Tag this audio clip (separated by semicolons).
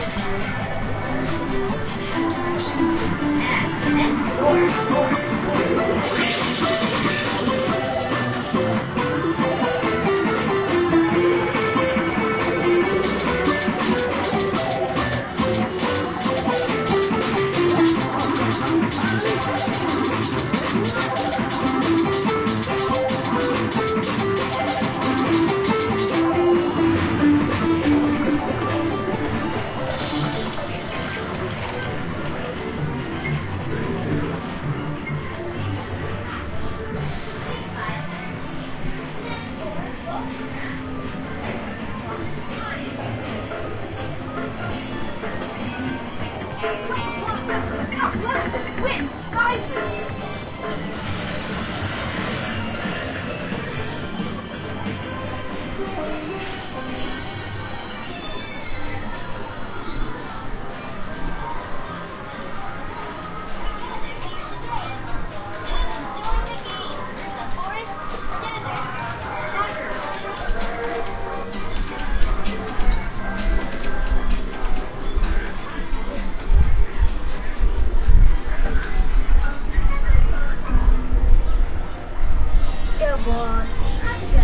Arcade; soundscape; ambience; Machine; computer; coins; Games; Seaside